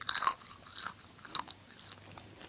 Crunching on a piece of popcorn.